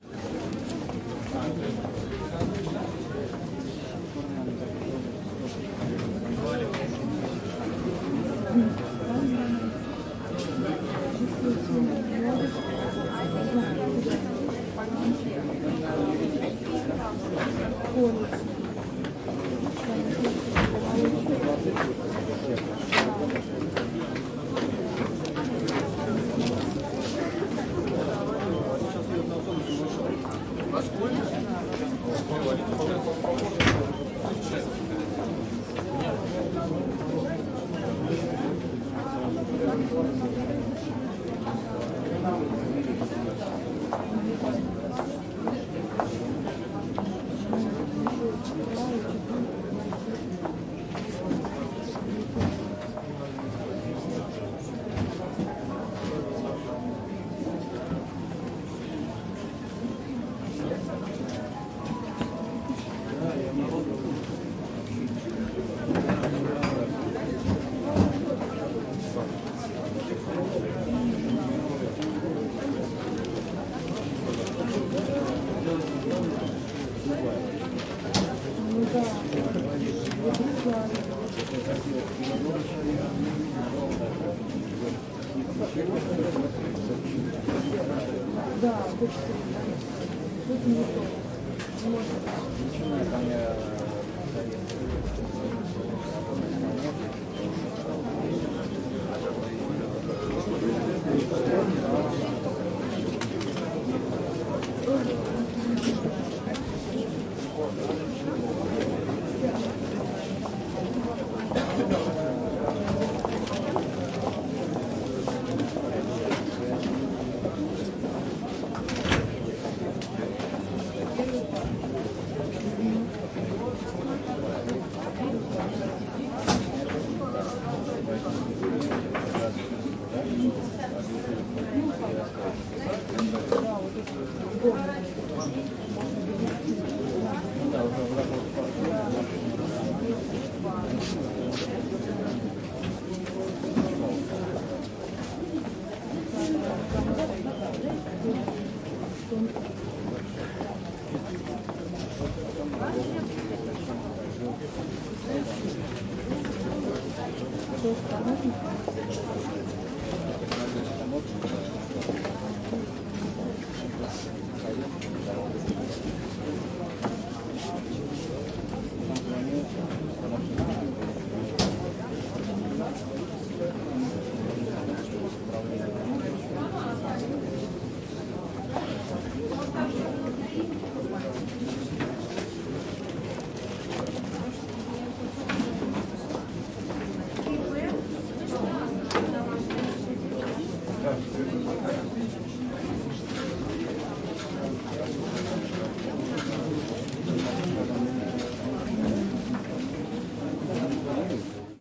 people - indoor crowd - government office, queue
ambience, field-recording, Russia, Moscow